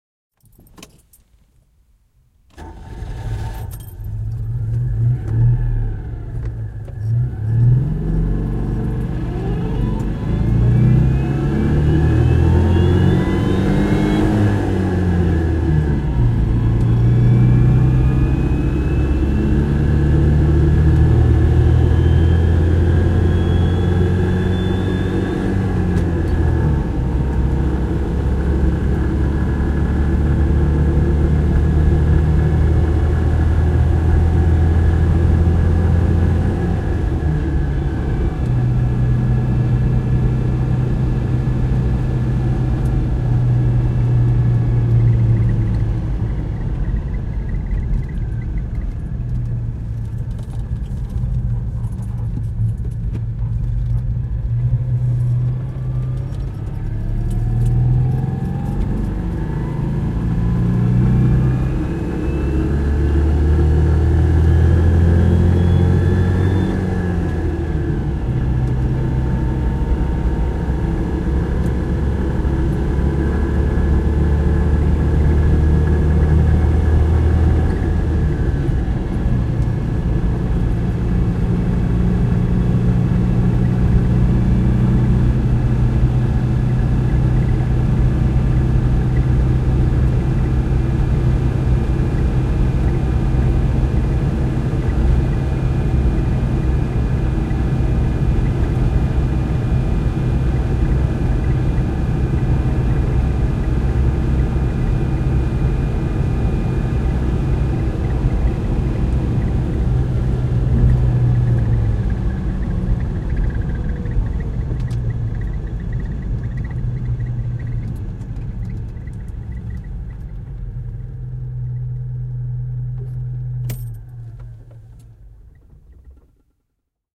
Henkilöauto, ajoa, Citroen 2 CV / A car, start, driving on asphalt, slow down, engine shut down, interior, Citroen 2 CV, a 1981 model
Citroen 2 CV, vm 1981, rättisitikka. Käynnistys ja ajoa asfaltilla n. 80 km/h, pysähdys, moottori sammuu. Sisä.
Paikka/Place: Suomi / Finland / Vihti
Aika/Date: 09.08.1995